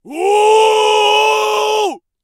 Low long scream
Screaming low and loud, showing the appearance of multiphonics in a voice when screaming. Possibly caused by noise resonance in in throat area.
Recorded with Zoom H4n
bawl, bellow, clamor, human, long, low, male, noise, resonance, roar, scream, vocal, voice